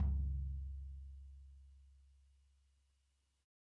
Dirty Tony's Tom 16'' 021
This is the Dirty Tony's Tom 16''. He recorded it at Johnny's studio, the only studio with a hole in the wall! It has been recorded with four mics, and this is the mix of all!
16, dirty, drum, drumset, kit, pack, punk, raw, real, realistic, set, tom, tonys